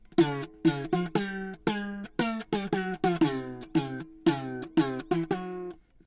toy guitar playing
A pack of some funny sounds I got with an old toy guitar that I found in the office :) Hope this is useful for someone.
Gear: toy guitar, Behringer B1, cheap stand, Presonus TubePRE, M-Audio Audiophile delta 2496.
cartoon, guitar, string, toy, toy-guitar